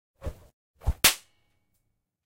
whip (dry)
This whip effect is made up of two elements - the whoosh sound is a USB direct link cable twirled in the air. The Pop is a shortened recording of a BlackCat small firecracker - both recorded with a Sony ECM-99 Stereo microphone to SonyMD. No effects were added, other than to increase the volume, and fade out some of the room noise around the whip sounds.
environmental-sounds-research, field-recording, soundeffect